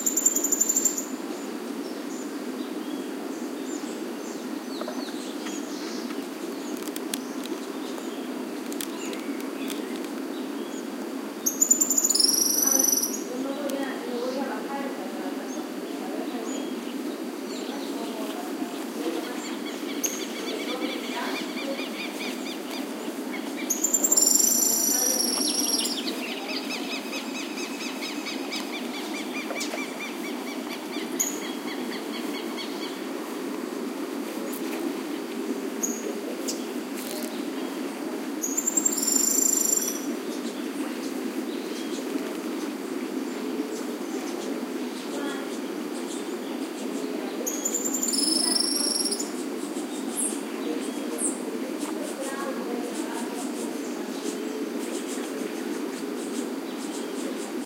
20160308 05.tropical.day
Dawn ambiance in a tropical, rural area, with insects, bird callings, some voices, and bubbling noise from a nearby hot tub. Recorded at Puerto Iguazu (Misiones, Argentina) using Soundman OKM capsules into FEL Microphone Amplifier BMA2, PCM-M10 recorder